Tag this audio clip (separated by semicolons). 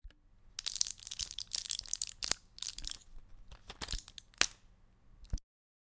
plastic; pills; medicine